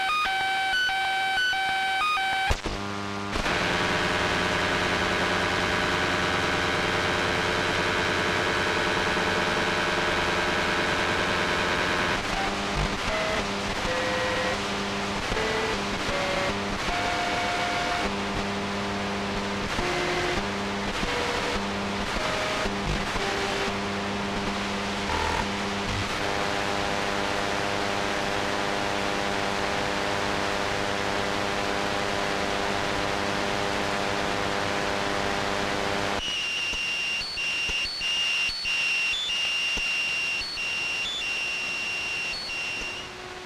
AT&T Cordless Phone dtmf AM Radio
Electro-magnetic interference from an AT&T; cordless phone handset CL82301 when held near the internal Ferrite antenna on the back right of a 13-year-old boombox set to the bottom end of the AM broadcast band. Recorded with Goldwave from line-in.
The recording starts with tones from the phone on standby near the radio. You hear a series of nearly pure tones. The phone comes on and you hear a distorted dial tone. Then you hear distorted dtmf tones 1 through 0, then a ring. I disconnect and the phone continues sending to the base for a few seconds so you just hear a hum, then the idle tones are heard. For some reason the tones are at a different pitch and speed on different days or at different times of the day.
am-radio, beep, buzz, cordless-phone, dial-tone, digital, distorted, dtmf, electro-magnetic, electronic, EMF, EMI, glitch, hum, interference, noise, pulse, radio-interference, t, tones